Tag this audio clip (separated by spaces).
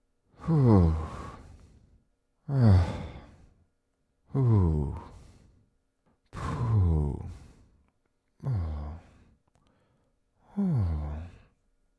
cheer comfort consolation human relief solace vocal voice wordless